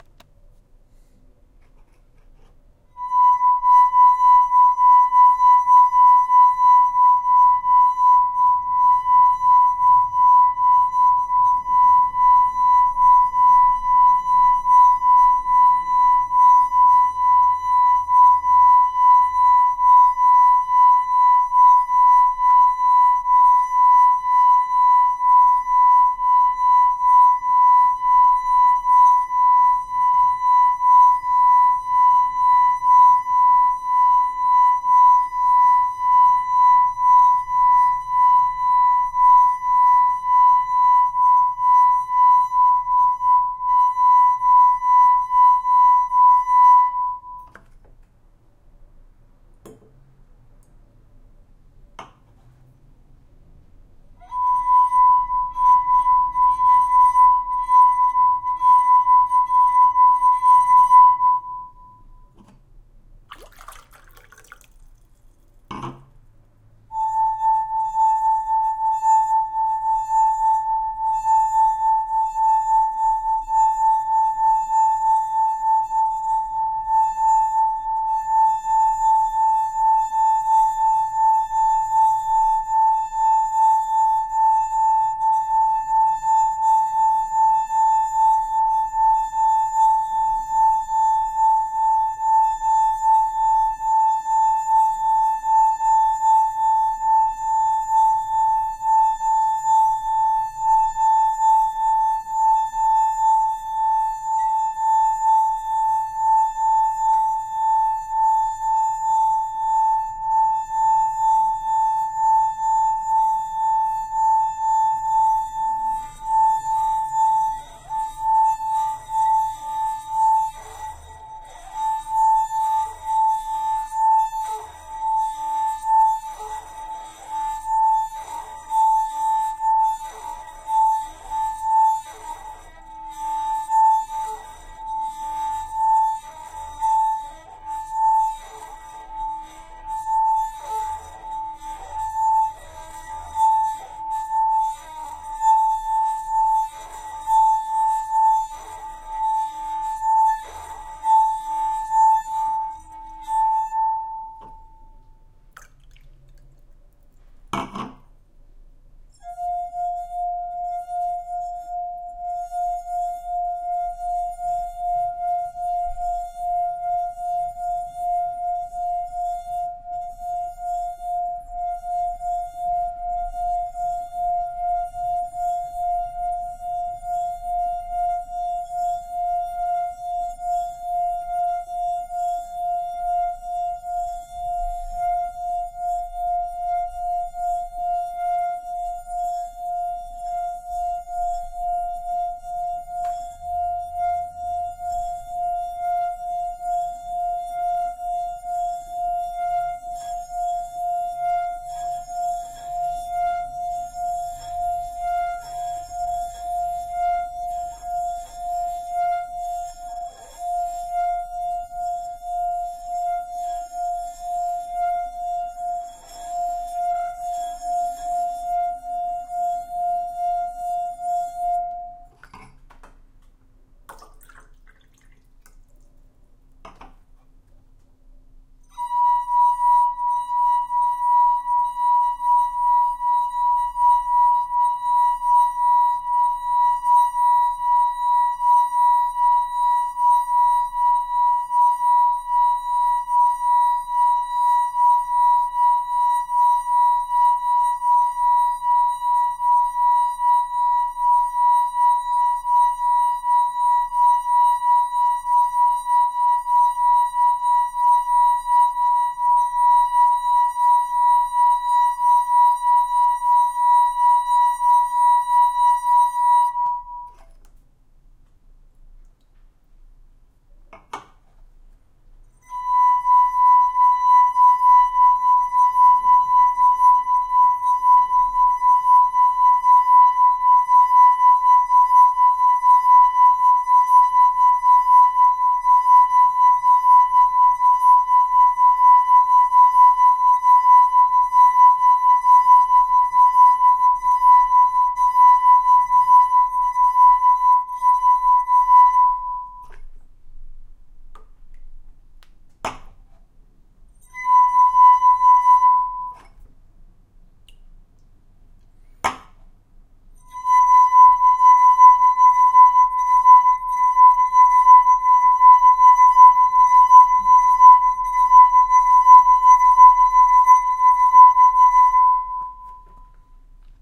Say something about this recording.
wine glass high
A high note made on a wine glass
wine, liquid, glass, wine-glass